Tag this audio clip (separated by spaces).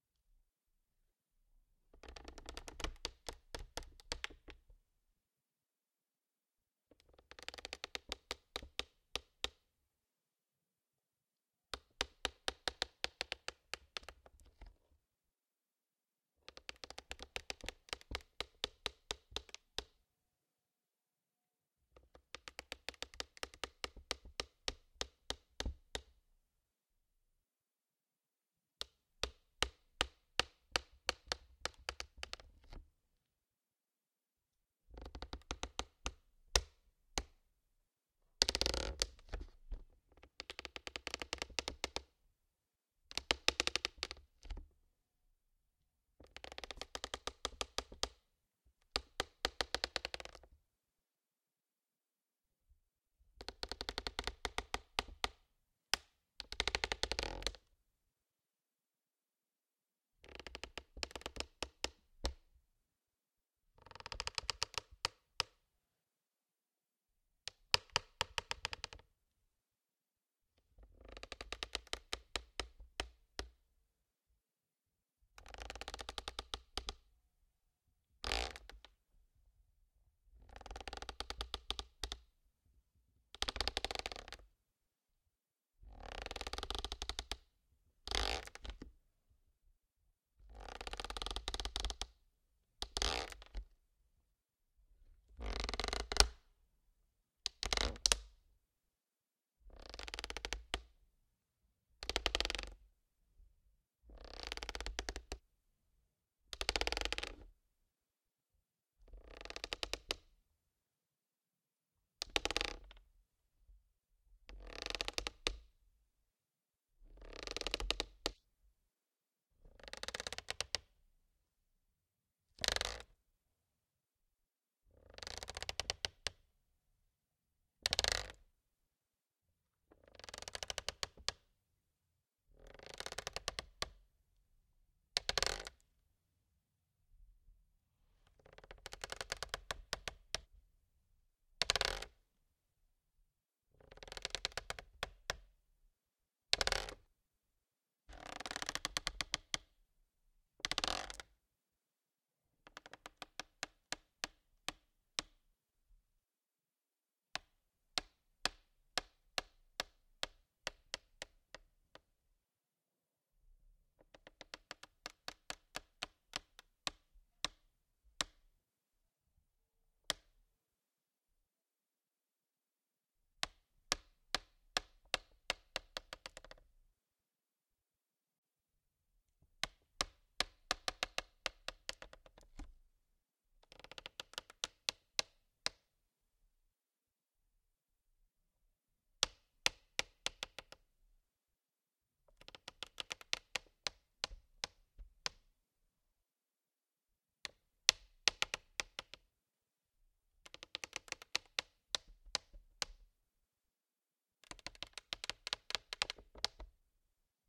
creak creaking creaky plastic-creak plastic-creaking ship wood-creak wood-creaking wooden wooden-ship wood-ship